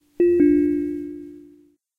Alert indicating a programme could not be carried out.
alert, interface, tone